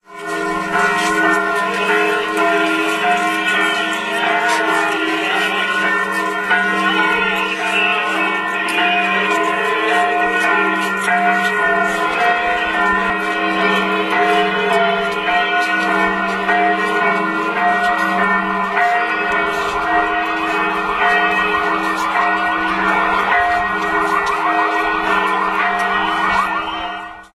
Corpus Christi procession in front of church2
03.06.2010: the Corpus Christi mass and procession in Wilda - one of the smallest district of the city of Poznan in Poland. The mass was in Maryi Krolowej (Mary the Queen) Church near of Wilda Market. The procession was passing through Wierzbiecice, Zupanskiego, Górna Wilda streets. I was there because of my friend Paul who come from UK and he is amazingly interested in local versions of living in Poznan.
more on:
crowd, mass, church, voices, poznan, procession, corpus-christi, singing, bells, people, choir, gospel, priest, field-recording, sing, poland, wilda, street